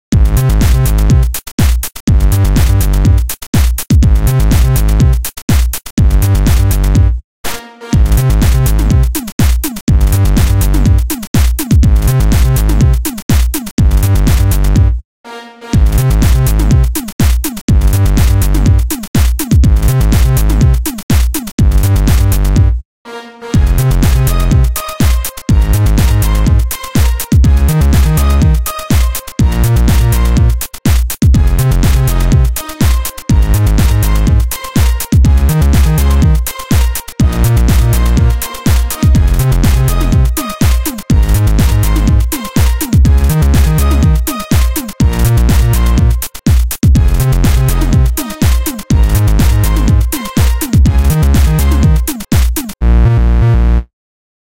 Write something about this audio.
8-bit ElectroHouse
8-Bit/Nintendo inspired electrohouse track. Ableton Live, with the free VST's: Toad and Peach.